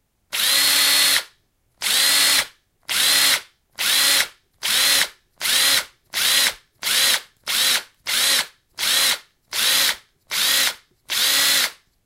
Drill Sharp Stops 1
Steel, Plastic, Bang, Friction, Metal, Tools, Boom, Impact, Tool, Smash, Crash, Hit